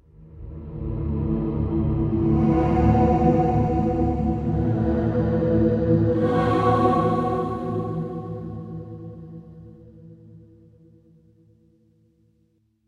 femasle voice band filtered sample "low" remix